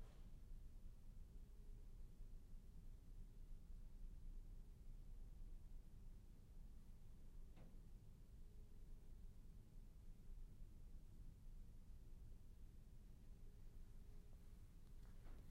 Room Noise 3
Part of a collection of sounds I recorded at an elementary school after the students have finished the year--the building was largely empty and as I've worked here, I've noticed a range of interesting sounds that I thought would be useful for folks working with video games or audio dramas!
Buzz, Room, Vacant, Tone, noise, background, School, Empty, Ambience, Indoor, Hum, Ambiance